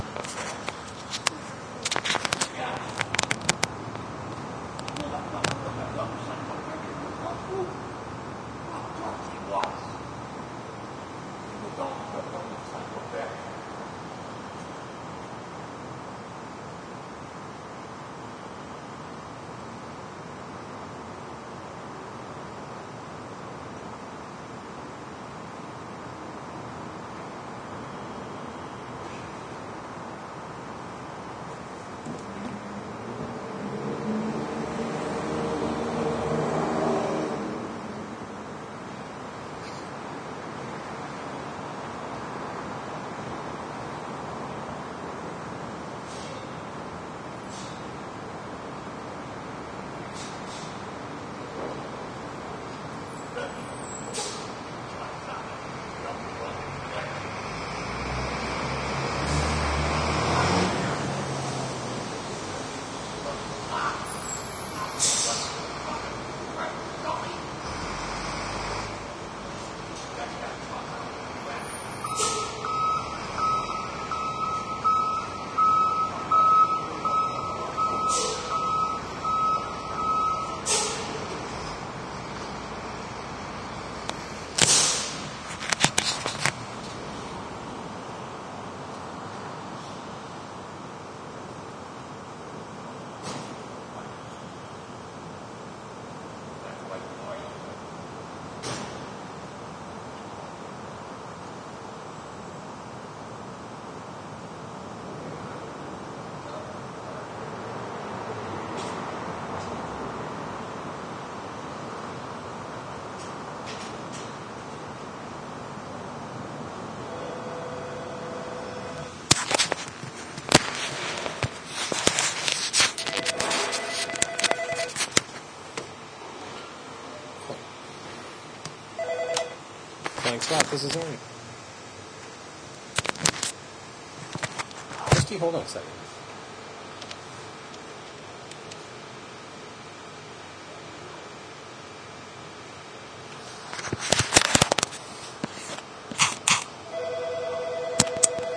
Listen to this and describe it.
street crazy man yelling ambiance trucks squeak brakes phone rings